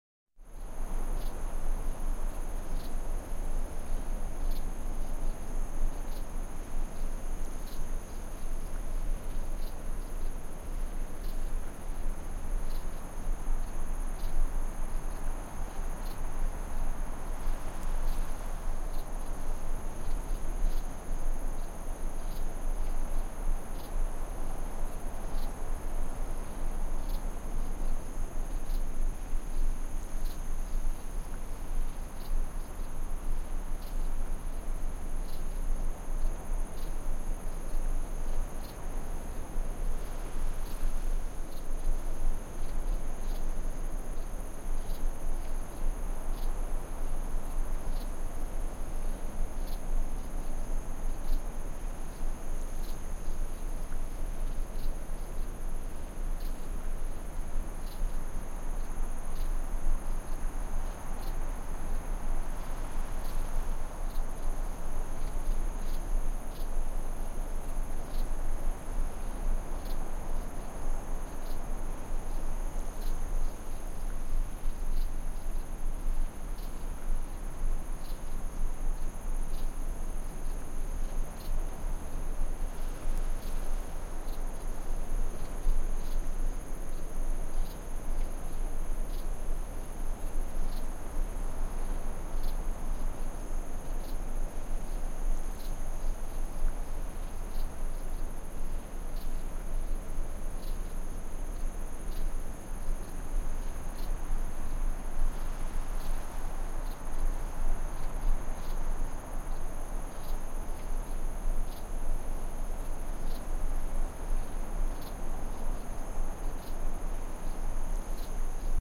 wind light desert day steady eerie with crickets
crickets, light, wind, steady, day, desert